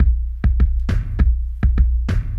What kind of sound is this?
loop based on a capoeira backbeat. a korg drum patch tweaked in a VS880 digital recorder then exported through cubase. 120bpm
beat, capoeira, big, groove, 120bpm